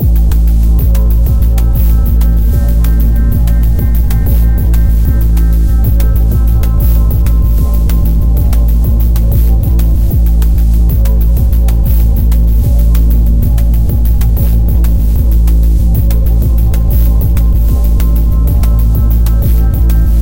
loop rhythm rhythmic beat 095bpm
beat, rhythmic, rhythm, 095bpm, loop